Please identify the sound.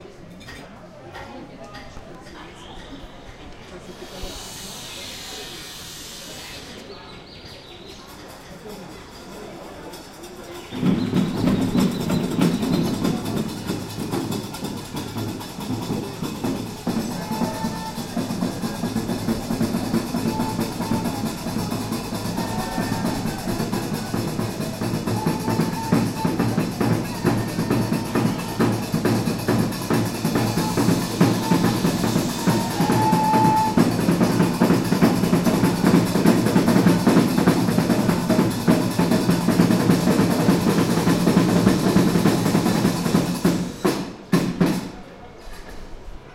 General ambient (featuring a band of Gnawa musicians) recorded from a roof of the Fez Medina.
Zoom H2
16 bit / 44.100 kHz (stereo)
Fez, Morocco - february 2010